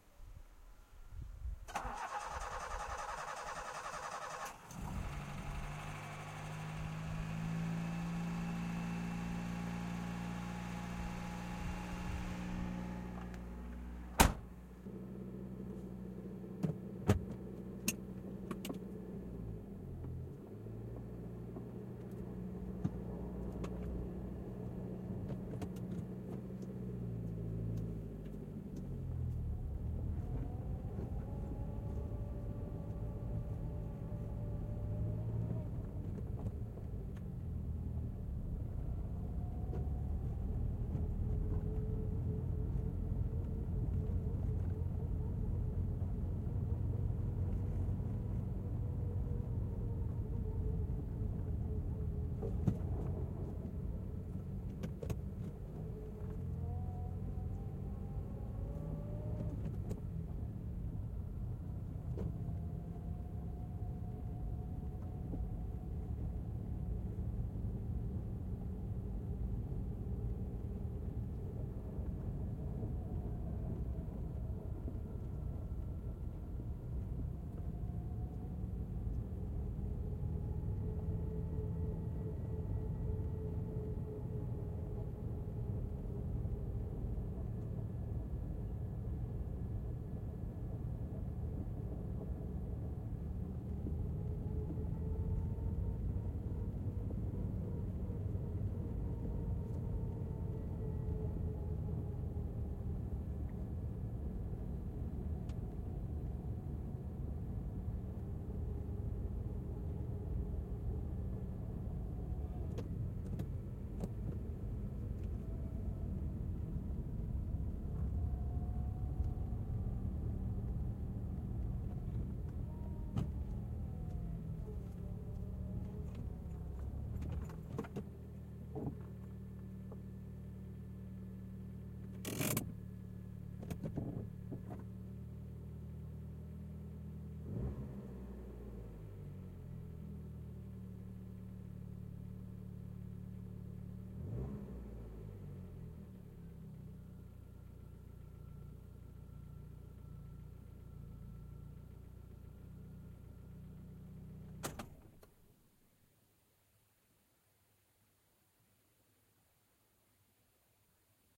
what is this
Car Sequence Part 3 short slow trip with switch off
Small Passenger Car Sequence
Part 3 - Start recorded external, then slow driving internal, scraping brakes -gear shifts -indicators -handbrake etc, stop and switch offrecorded on the interior of a Toyota Conquest Driving in Johannesburg, South Africa on a Tascam DR-07.
field-recording, motor, accelerating, interior, driving, ambience, drive, road, vehicle, noise, car, start, engine